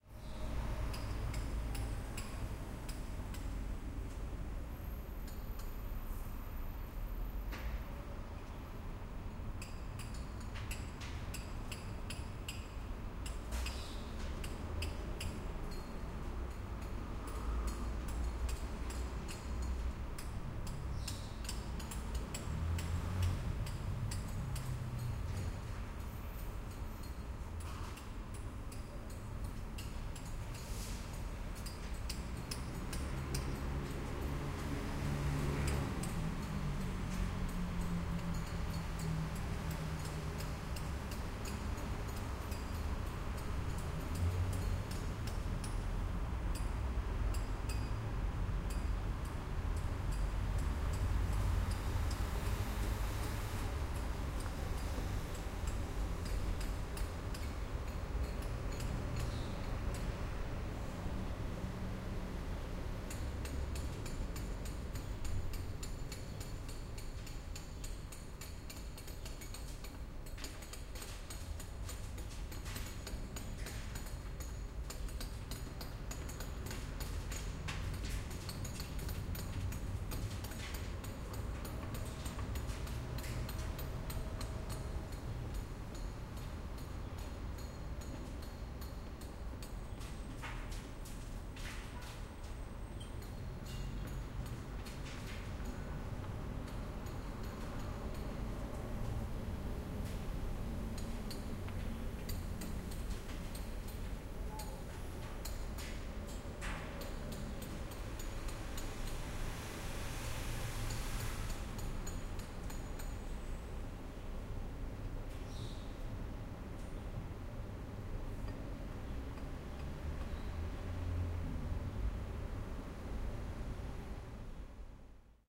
Construction1 Barcelona
Field recording in Barcelona, next to Sagrada Familia. One can hear the sounds of the construction, at least 2 different men hitting the walls. Towards the end you can hear some material falling down. there is traffic sound from the street all the time. Recorded with Edirol R-09
barcelona, city, construction, field-recording, traffic